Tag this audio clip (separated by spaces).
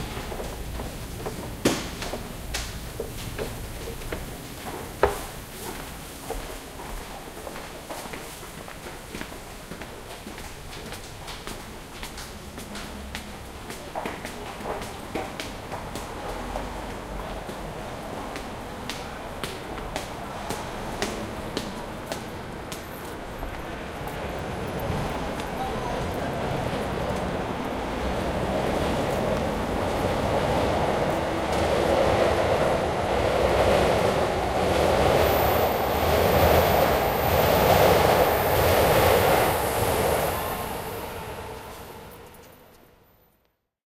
depart announcement railway train-station departure transport arrive arrival departing train-ride train-tracks tram footsteps public-transport subway arriving Japan announcements platform beeps Tokyo field-recording tube underground rail station metro railway-station train